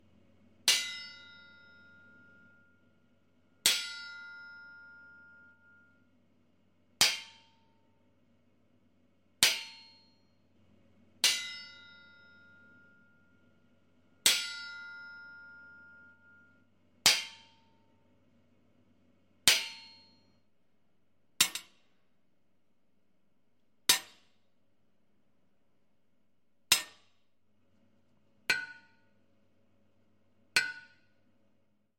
Tapping metal Various 1
Tapping various metallic objects
factory, metallic